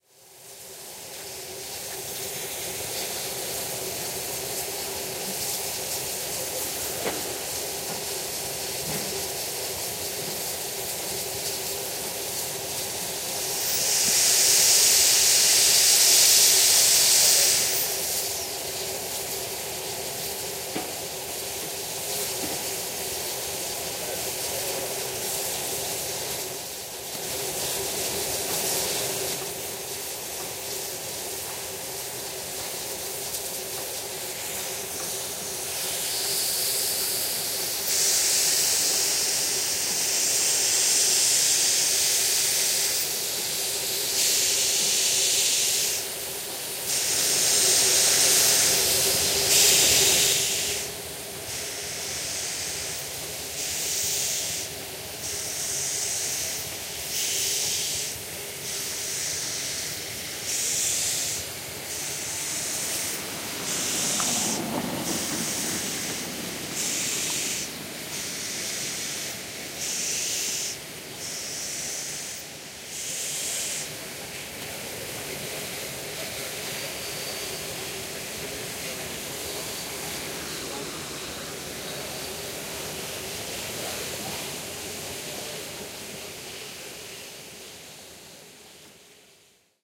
20160302 04.ushuaia.train
Tourist train at station. Recorded near Tren del Fin del Mundo (Tierra de Fuego National Park, Argentina), using Soundman OKM capsules into FEL Microphone Amplifier BMA2, PCM-M10 recorder.
field-recording, railway, station, traffic, train